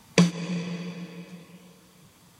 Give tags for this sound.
drum
electro
electronic
heavy
hit
kit
loud
percussion
powerful
snare
synthesized

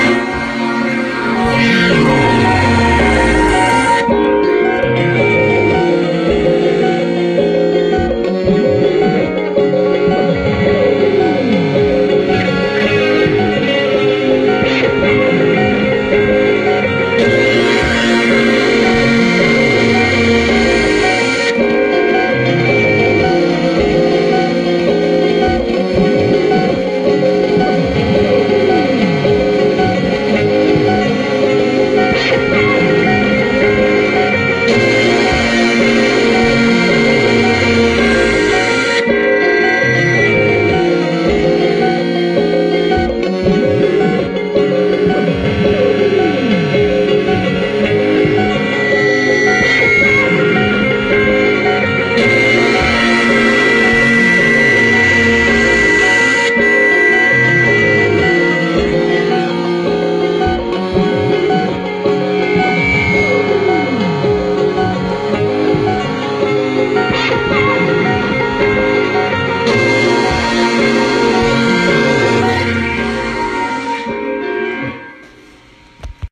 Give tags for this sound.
loop
pedals

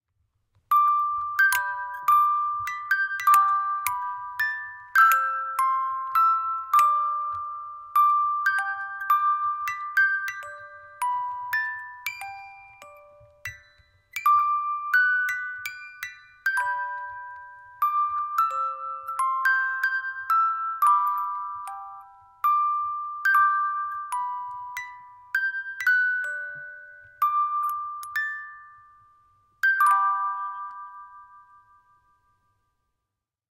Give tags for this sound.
mic
box
amazing
hymn
yeti
grace
music
crank

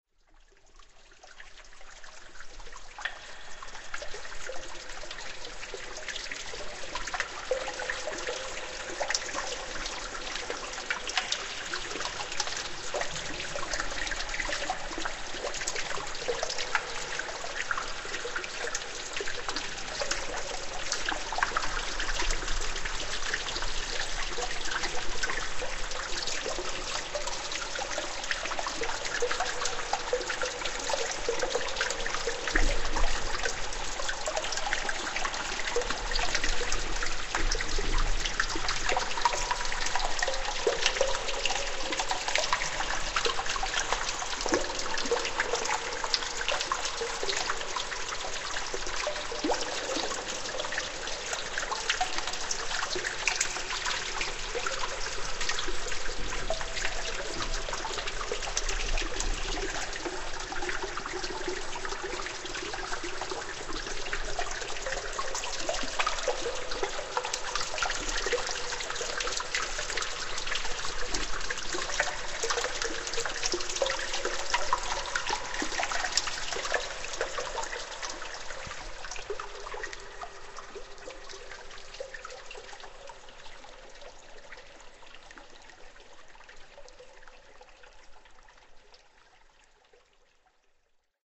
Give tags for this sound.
liquid
wet

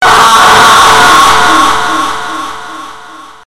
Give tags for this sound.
Horror
Monster
Scary
Scream

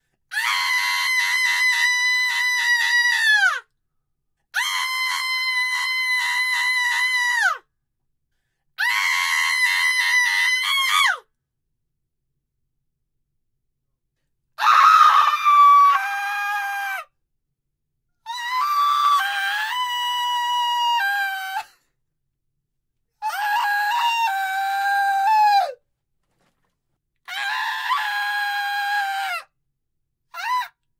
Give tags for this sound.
Pitch
Male

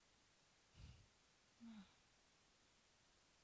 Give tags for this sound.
respiracion
respiracion58
respiracion4

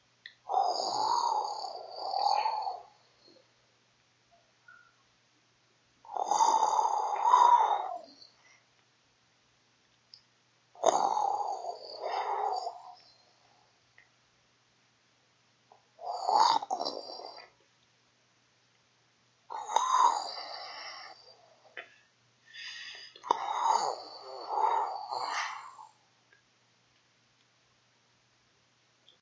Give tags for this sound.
cangrejo
mar

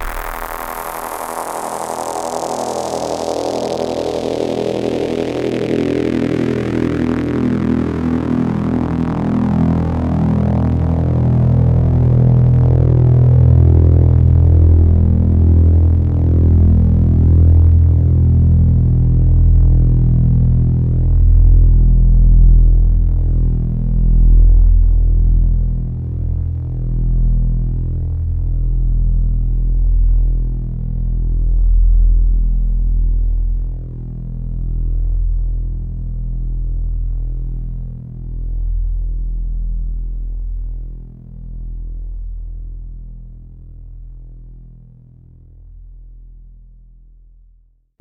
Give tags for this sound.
multi-sample
synth
sweep
waldorf
saw
electronic

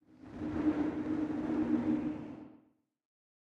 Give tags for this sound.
assembly
converter
Factory
line

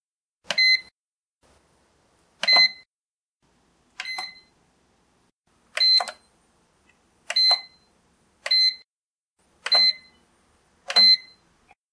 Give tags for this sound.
bipbip
lock

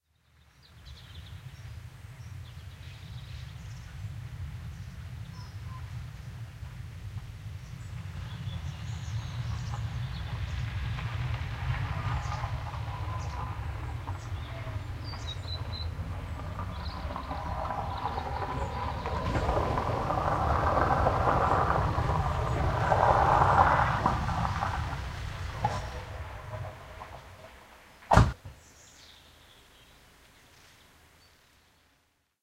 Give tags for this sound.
xy
gravel
vehicle
stereo
door-bang
car
drive

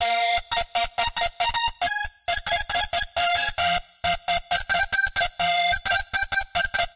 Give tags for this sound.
bass
bitcrush
distorted
free
grit
guitars
live

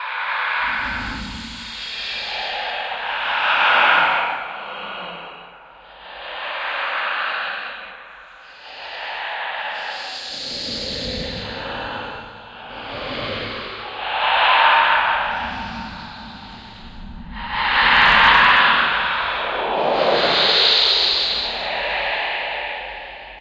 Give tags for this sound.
chill
chills
eerie
ghost
ghosts
haunted
horror
scary
terror
whisper
whispering

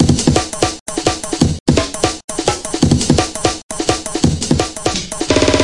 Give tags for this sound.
break
170
beats
loops

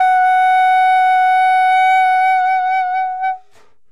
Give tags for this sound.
saxophone
sampled-instruments
woodwind
alto-sax
vst
jazz
sax

